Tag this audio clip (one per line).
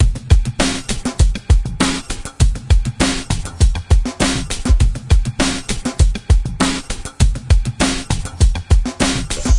drums
video
melody
8-bit
sounds
synthesizer
drum
samples
synth
digital
chords
loop
loops
music
awesome
sample
hit
game